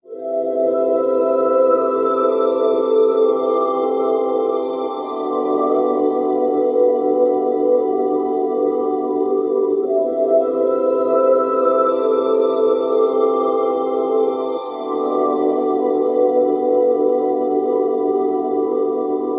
An ambient pad made with the Thor-synth in reason.
Synth, Ambient, Pad